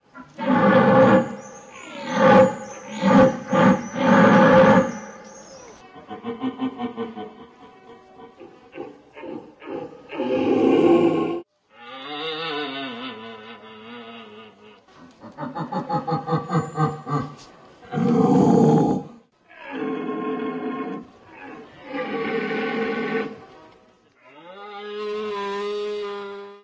jelenie deer
deer sound contest in Poland
recorded by sony camera